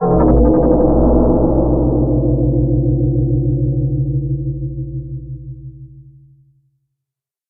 Low pitched FM metallic drumming, closes with ringing reverb, vibrato, and short portamento up.
sci-fi, horror